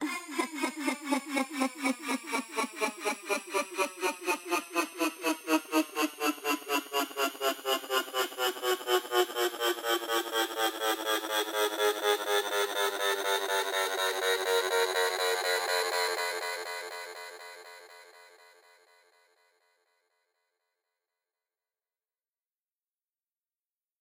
Breathy Riser
One octave riser in key of C made with granular synthesis from samples I got off this website :)
build, dance, drop, dubstep, edm, house, riser, suspense, tension, trance, trippy